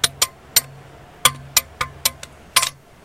the sound of my bike's padlock against a metal bar, some key sounding and distant traffic noise.
field-recording, key, padlock, metal